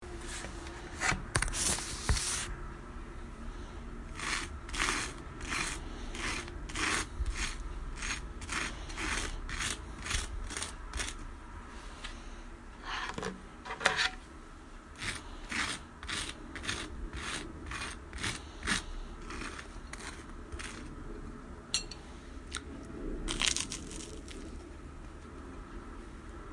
Getting my toast ready! What a delicious meal!!